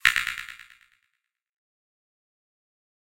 ui error1
A sound that can represent an error.
application, button, menu